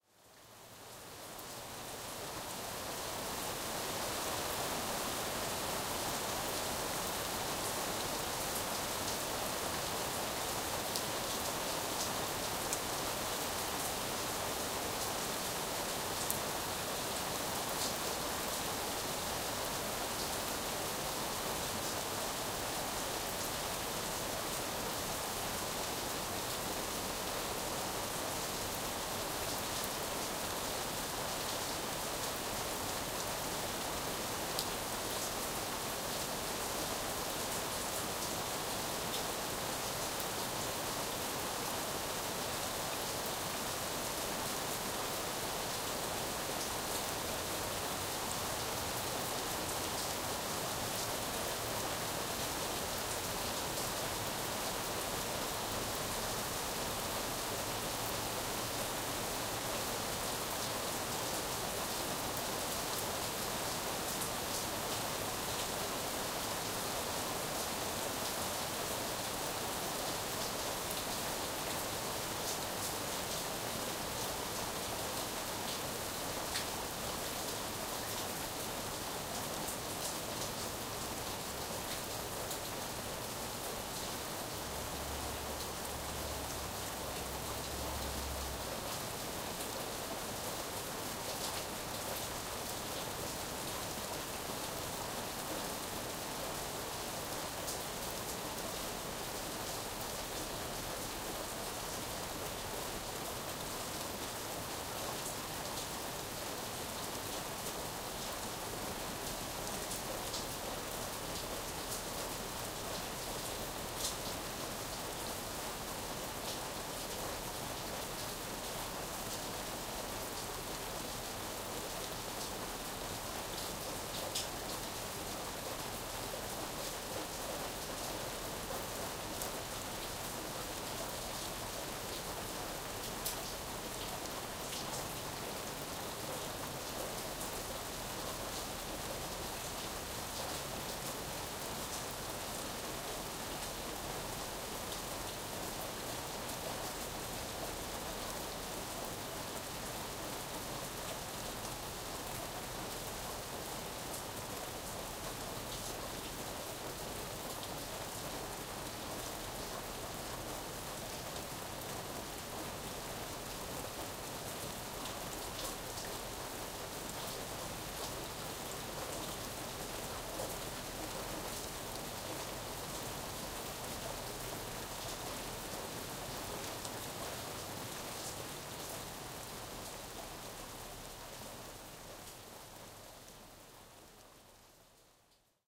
steady rain in the city
Recorded with a Q3HD on my windowsill.
More of a steady rain with a few background "city" noises.